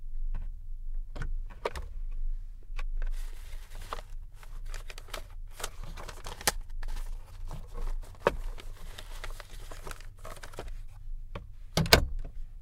Opening and closing of glovebox in Ford Taurus with rummaging around